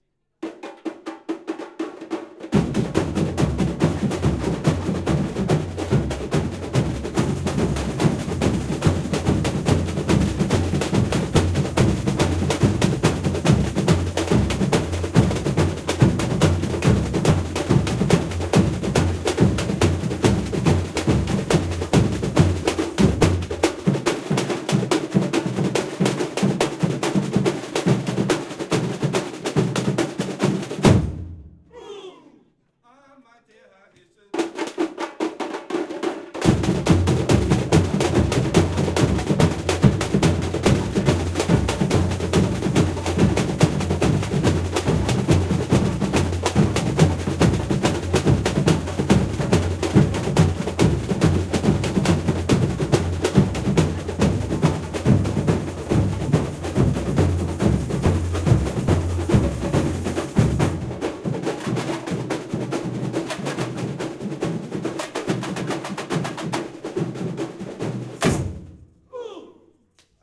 110611-001 bateria rehearsal tijuca style
Samba batucada rehearsal at the Berlin Carnival of Cultures June 2011 (Karneval der Kulturen). The band is playing the rhythm style of the Samba school of Tijuca from Rio de Janeiro, Brazil. Zoom H4n
bateria, batucada, berlin, bloco, brazil, caixa, carnaval, carnival, drum, escola, escola-de-samba, karneval, percussion, repinique, rio, rio-de-janeiro, samba, samba-groove, samba-rhythm, samba-school, tijuca